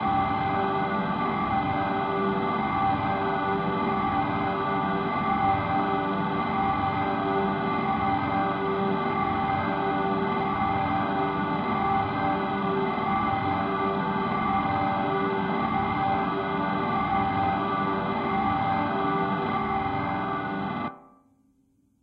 This is a drone created in Ableton Live.
I processed this file:
using Live's built in Ressonator effect (tuned to C#) followed by an SIR (And Impulse Response) effect, followed by Live's Cabinet emulator.
harsh, Ableton-Live, Ambient, atmosphere, drone